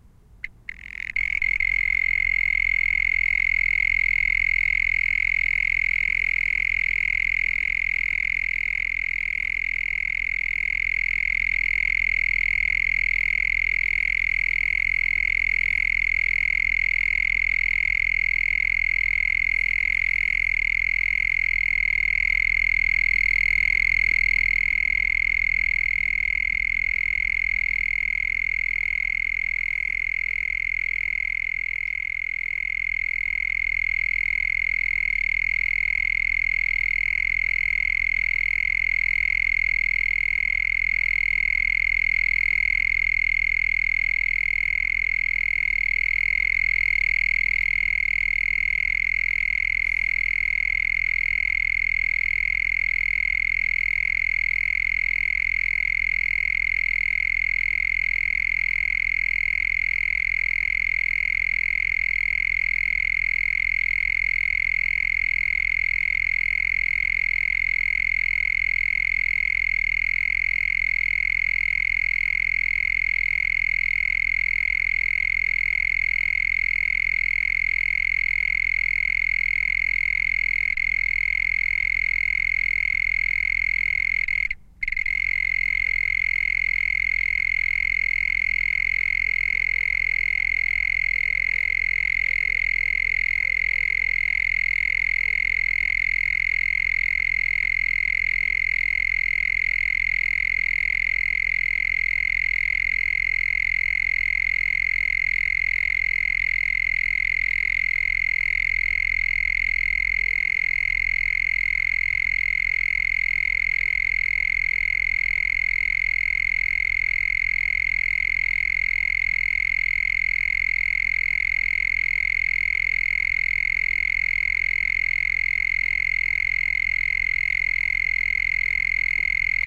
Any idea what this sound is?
cricket chirping close. Recorded near Tavira, Portugal with two Shure WL183 capsules into FEL preamp, Edirol R09 recorder